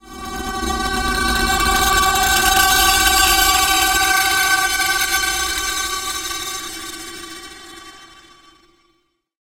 2oct3over2glisslowdg
Another Granularised 5th
granular, santoor